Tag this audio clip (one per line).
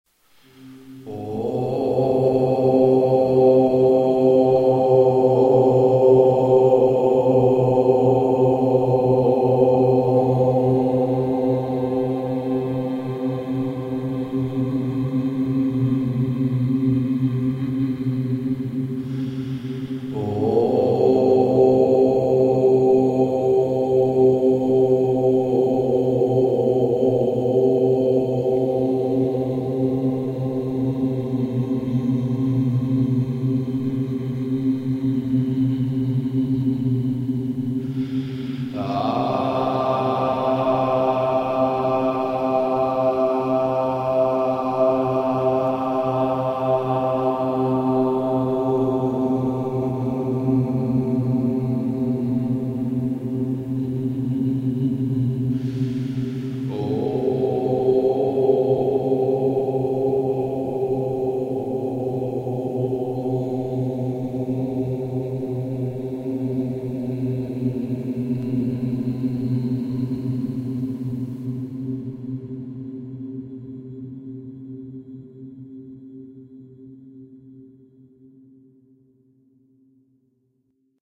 528Hz
Aum
Meditation
Voice
Om
mantra
Man